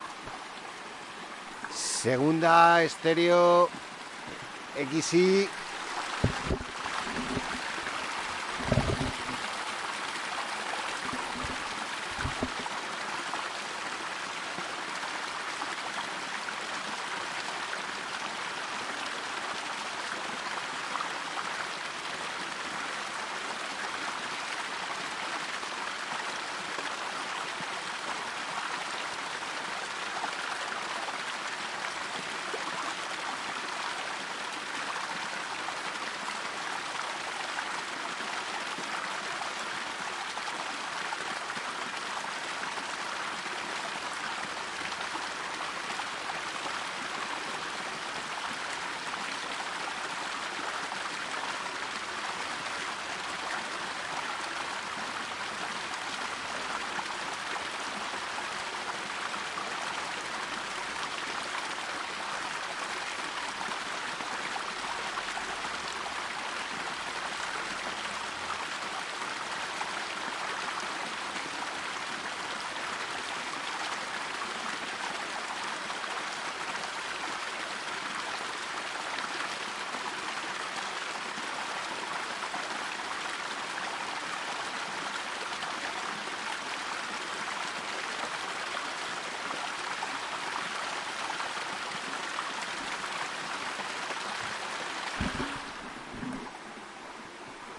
water flows creek
water flows, recorded with the xy microphone of the zoom h2n
mountains, water, creek, river, drops, switzerland, alps, waterfall, drought, flows